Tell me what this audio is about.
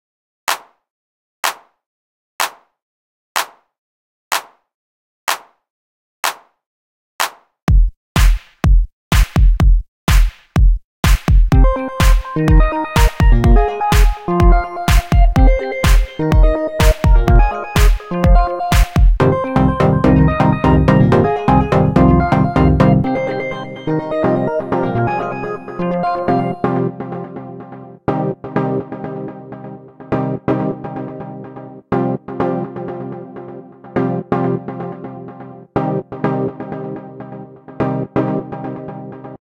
Mix Down Intro
intros, effect, sfx, sound, ringtone, loop, electronic, intro, jam, startup, digital, remix